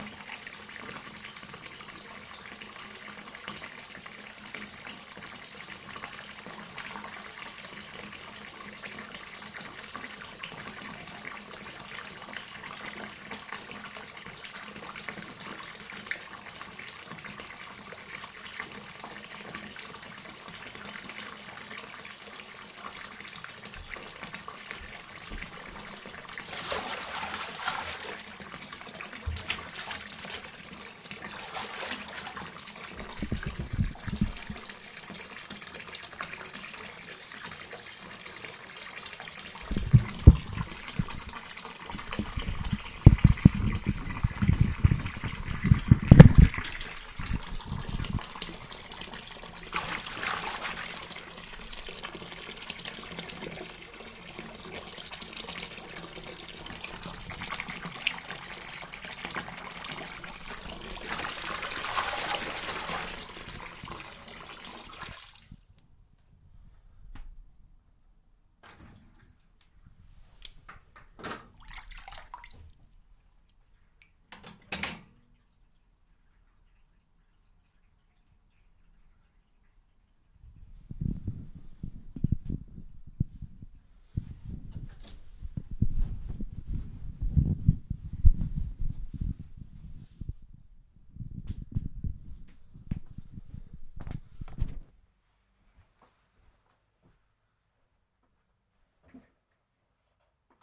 bath FX
recording of bath running with water being splashed then draining away recorded with SM58
drain, running-tap